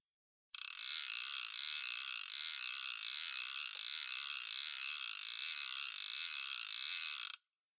Angel Fly Fish Reel Medium Wind 1
Hardy Angel Fly Fishing Reel winding in line medium speed
clicking, fishing, fly